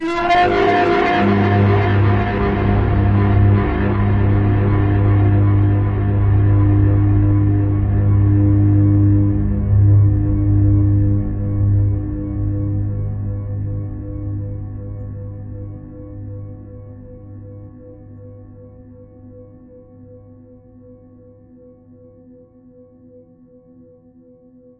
Hi everyone!
SFX for the scream moment in horror game or movies.
Software: Reaktor.
Just download and use. It's absolutely free!
Best Wishes to all independent developers.
sfx-for-game, scream, horror, fear, sfx-for-movies, scary, sinister, monster